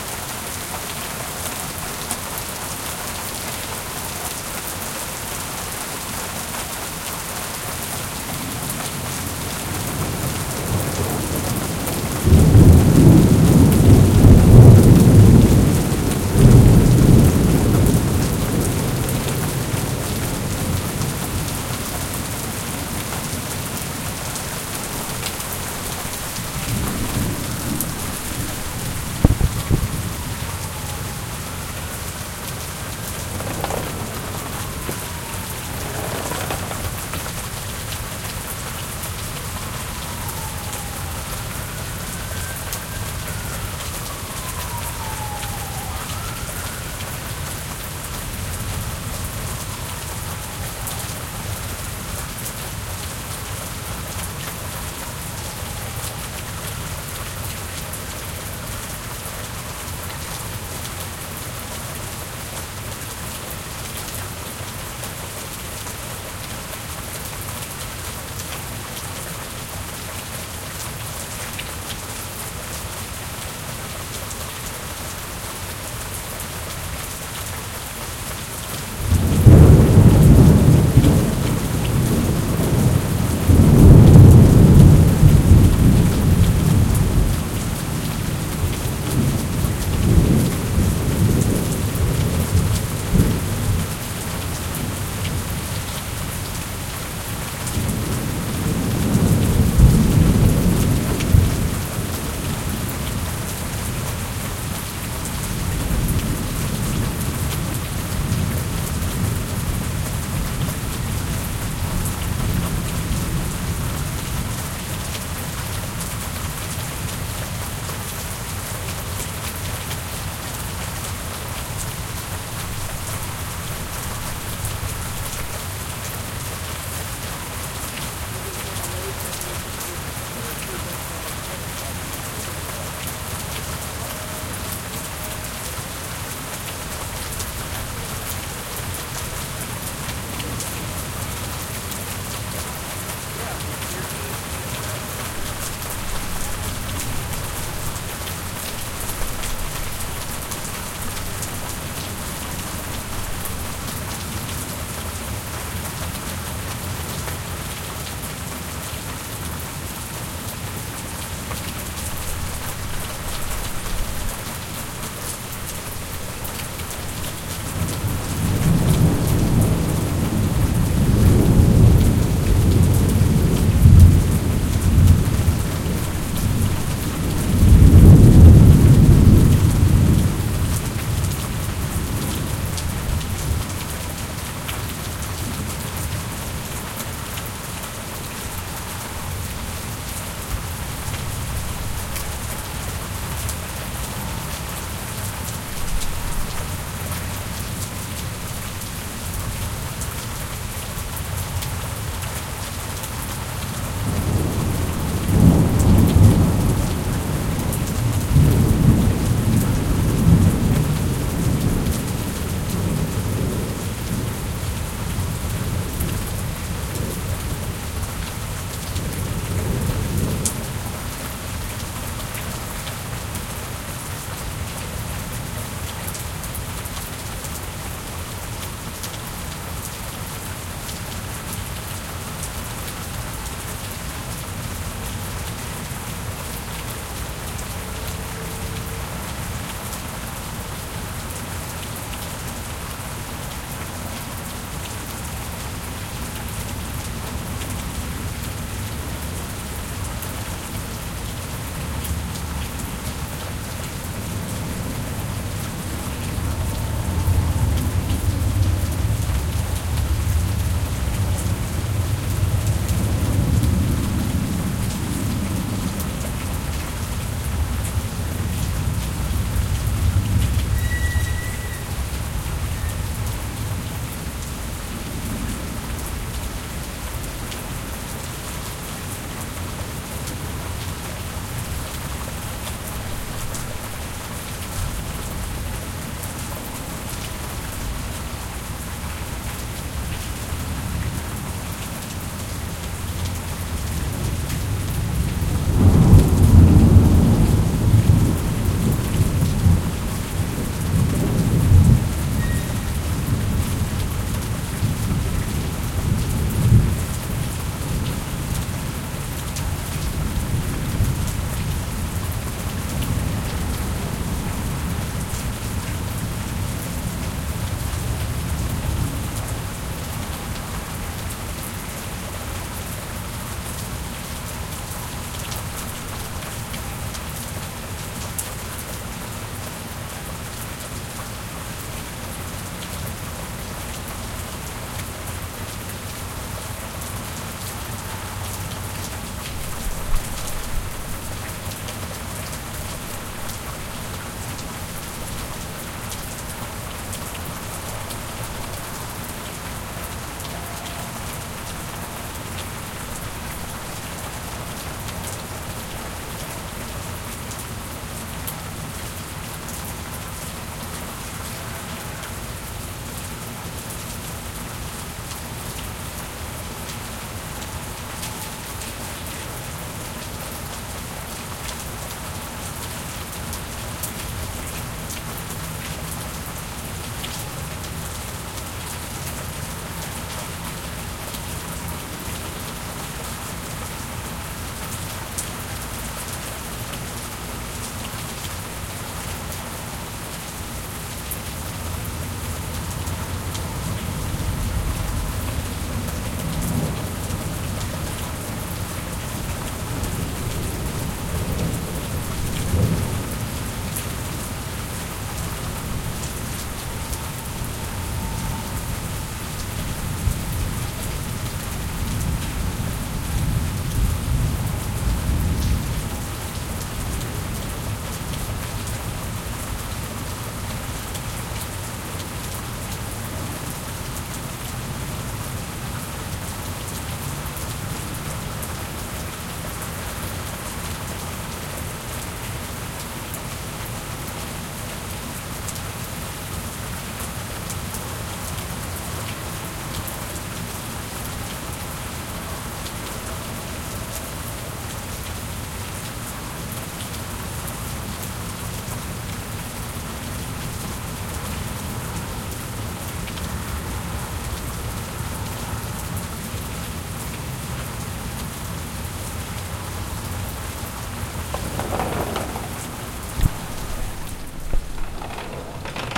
Thunderstorm Rain 2
Storm from my balcony.
shower, raining, rainy, thunder, overcast, thunder-storm, rain, thunder-shower, thundershower, storm, lightning, thunderstorm, weather